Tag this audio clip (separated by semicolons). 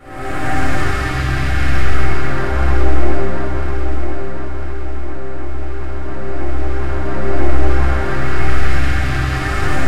background; granular; processed